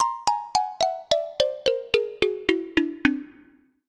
Xylophone for cartoon (6)
Edited in Wavelab.
Editado en Wavelab.